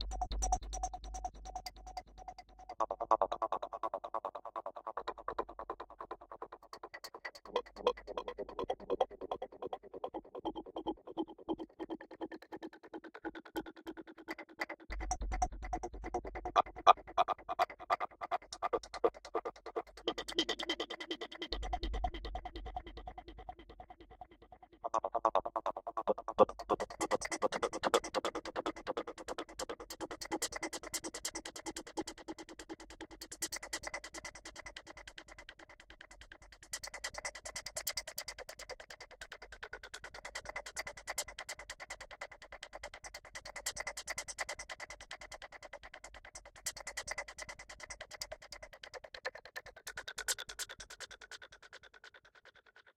Made using a recording of me making consonant sounds (and a sprinkling of vowels) then lots of post-processing (bionic delay vst was involved).